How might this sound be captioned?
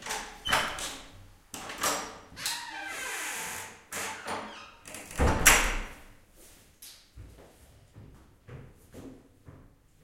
WOOD DOOR 1
closed, door, open, slam